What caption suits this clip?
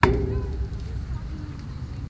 Metal coated tree with mallet and stick samples, recorded from physical portable recorder
The meadow, San Francisco 2020
metal metallic resonant percussive hit percussion drum tree field-recording industrial impact high-quality city